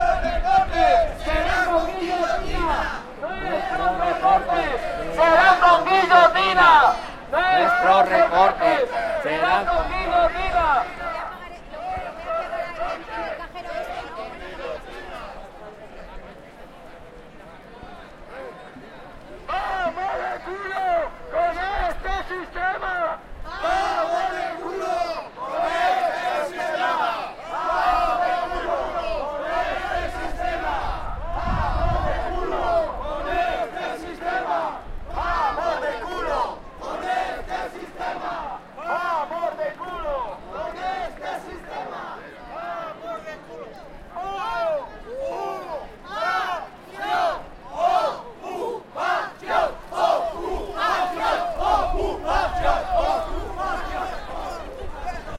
Manifestació PAH: 'vamos de culo'
So enregistrat a la manifestació pel dret a l'habitatge a València, convocada per la Plataforma d'Afectades per les Hipotèques.